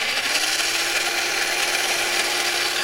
The spinning wheels of a remote controlled car. Recorded with a micro-track.
spinning, wheels